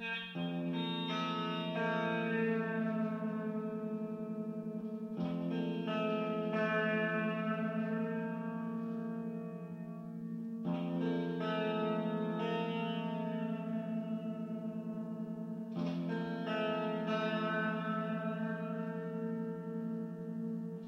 Sample of a slide guitar from a live noise music recording
slide, sound, strange, jesus, burger, ambient, guitar